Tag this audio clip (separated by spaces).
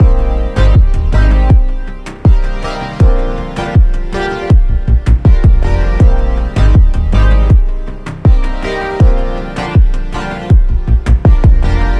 house chill loop lowkey